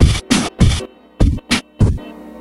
talker 1fll
another maestro drumloop run through a digitech talker.
filtered, vocoded, drumloop, loop, analog, processed